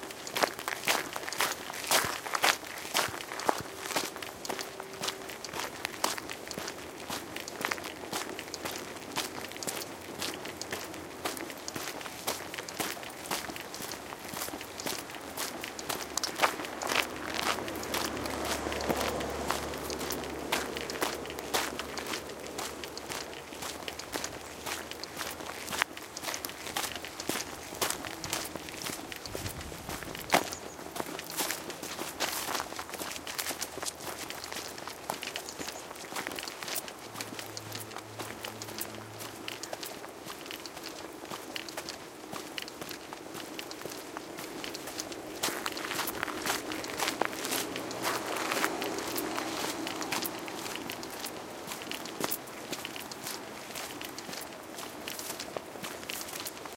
Walking1 gravel
A recording of a walk on a gravel path at the old soccer field in Golden Gate Park Ca. USA.
Thanks to all who enjoy and or use this recording. jcg
ambient, crunch, feet, field-recording, foley, foot, foot-steps, footstep, footsteps, gravel, sound-effects, step, steps, walk, walking